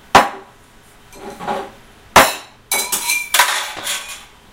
Various sounds from around my kitchen this one being dishes rattling
crash, dishes, kitchen